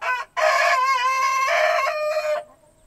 Rooster crowing (single crow)